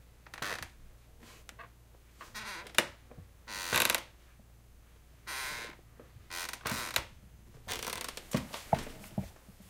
creaky chair
creak, squeak